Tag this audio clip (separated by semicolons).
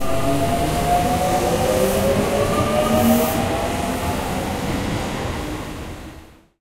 field-recording; announcement; train-station; platform; underground; departing; rail; depart; announcements; metro; train; tram; railway-station; public-transport; departure; station; Tokyo; train-ride; arrival; walking; tube; subway; train-tracks; transport; railway; beeps; footsteps; Japan